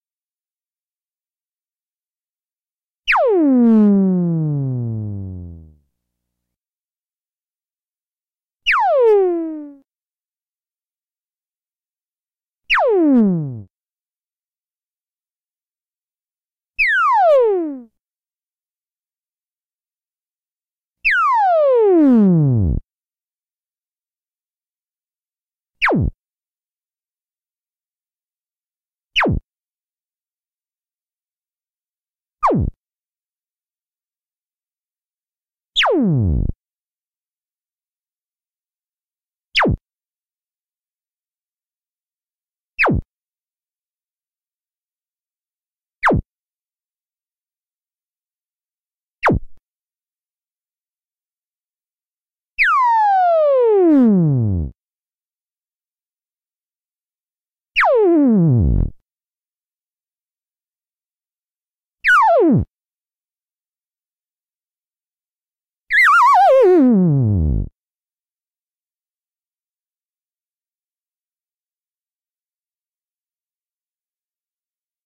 As already stated, he term, "Pyew!" does not refer to a funky smell. Think of it as the sound Bernadette (from Big Bang Theory) makes when she's playing video games and trying to phonetically approximate the single shot of a ray gun or laser weapon. This file contains a whopping 17 different individual sounds each separated by 3 seconds of silence, and each with a different lengths and sonic qualities. Everything you need to go totally Pyew-wild!
Every effort has been made to eliminate/reduce hum and distortion (unless intentionally noted).